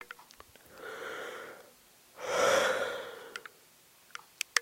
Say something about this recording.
A person yawning out of exhaustion...or boredom.
breathe, tired, yawn